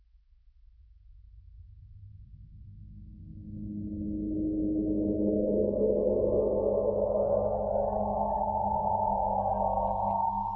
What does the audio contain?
Subsonic Wave
Sample of bassy pad
Please check up my commercial portfolio.
Your visits and listens will cheer me up!
Thank you.